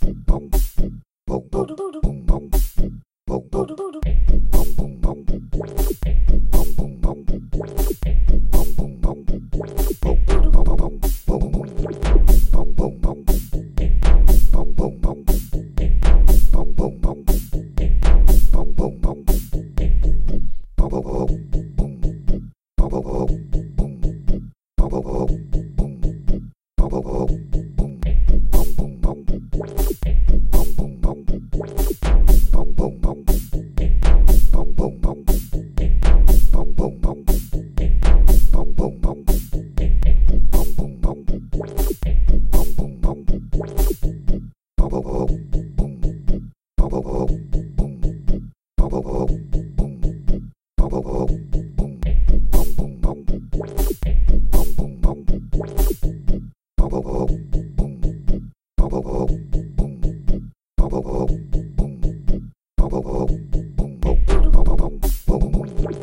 I created this vocal percussion loop using my voice, Hydrogen, and Audacity.